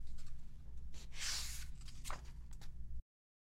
Flip pages
Fliping pages from a book